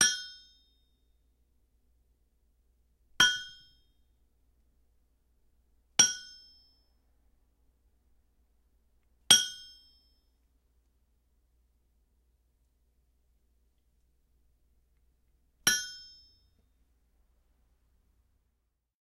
Anvil Strikes 5
Five strikes with a steel hammer on a 100 year old, 200+ lbs blacksmiths anvil. Recorded with and AKG C2000B, Presonus Digimax FS mic pre to an Alesis HD24 digital recorder.
strikes, anvil